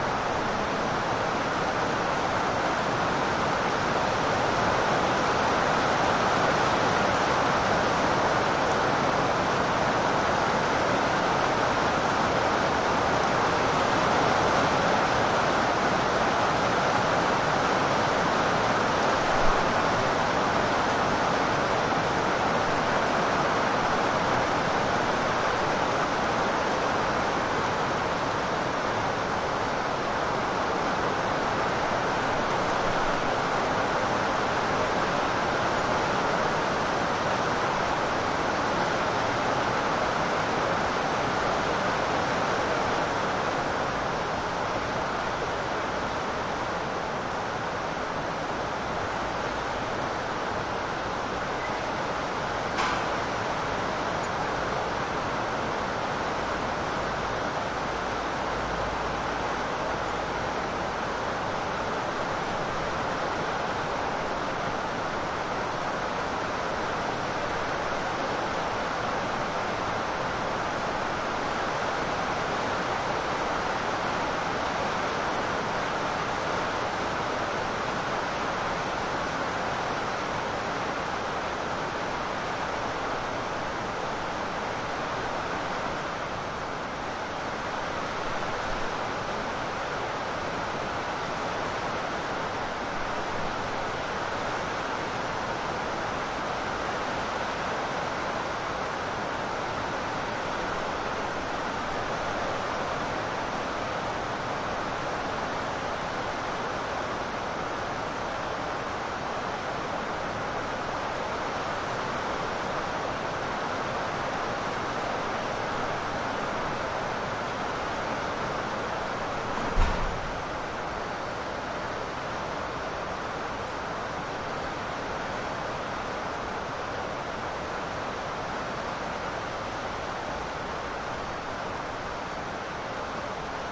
rain heavy on hotel roof3 intensity decreases slightly Gaza 2016
heavy, roof, hotel, rain